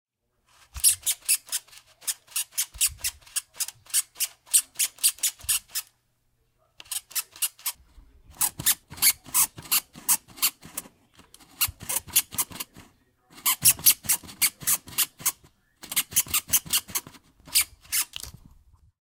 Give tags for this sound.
Quick; Squeak

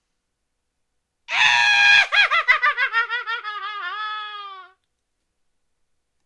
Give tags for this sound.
single; laugh; solo; evil; male; cackle

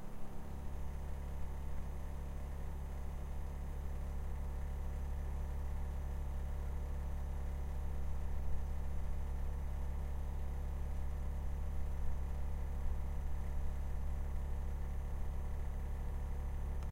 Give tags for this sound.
outdoor; air; Sound

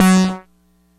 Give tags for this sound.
synth; multi; sample; bass

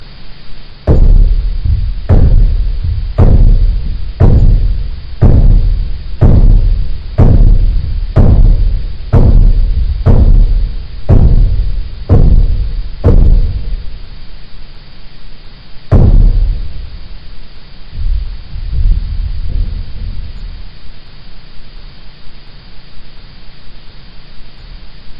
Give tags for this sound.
bully; bullying; drama; hard; horror; horror-effects; horror-fx; suspense; terrifying; terror; thrill

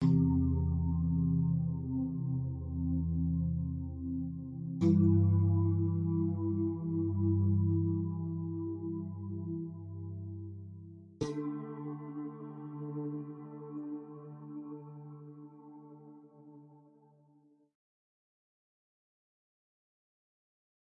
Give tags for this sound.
150bpm; electronic; string; synthesizer

